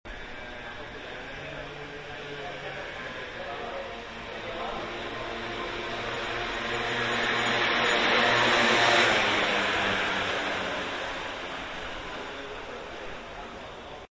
scooter.DS70P
scooter passing right-to-left, some voices. Recorded wih Sony stereo DS70P and iRiver iHP120 /motillo pasando de derecha a izquierda, algunas voces